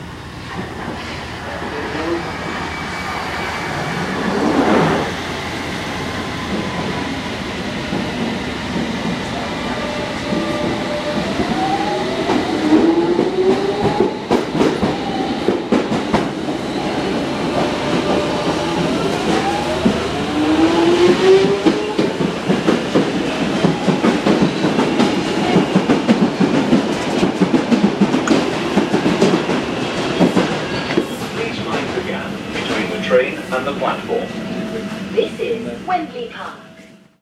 London Underground- tube trains arriving and departing
Busy recording of an above ground tube station. It's still busy even at 9pm on weeknight. Lots of different trains arriving and departing Wembley Park station in NW London. Ends with me boarding a Metropolitan line train to ?Aldgate? (too long ago can't remember). Recorded 18th Feb 2015 with 4th-gen iPod touch. Edited with Audacity.